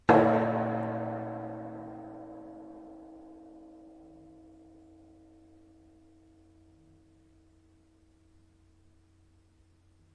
Field recording of approximately 500 gallon empty propane tank being struck by a tree branch. Recorded with Zoom H4N recorder. For the most part, sounds in this pack just vary size of branch and velocity of strike.